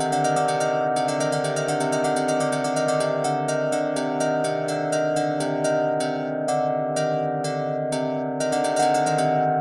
TIG New Wave 125 MGuitar B
From a song in an upcoming release for Noise Collector's net label. I put them together in FL. Hope these are helpful, especialy the drum solo and breaks!
lead, new-wave, track, acoustic, realistic, 125bpm